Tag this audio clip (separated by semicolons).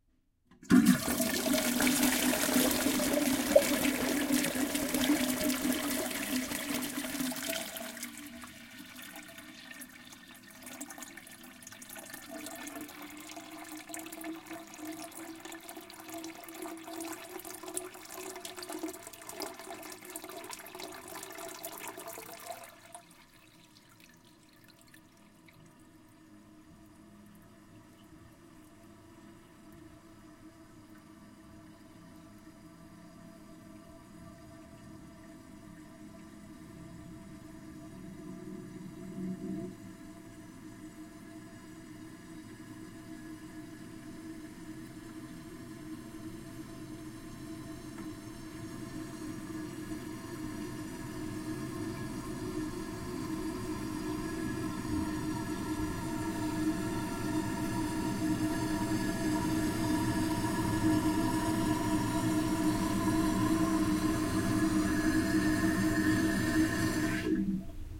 refilling; flushing; toilet-refilling; water; flush; drone; out-of-this-world; toilet; alien; bathroom; sci-fi